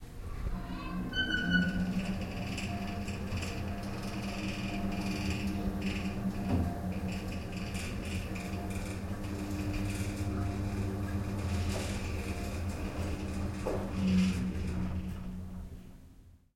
Part of a bunch recordings of an elevator. One of the sounds being me sneezing.
I find these sounds nicely ambient, working well in electronic music that I myself produce.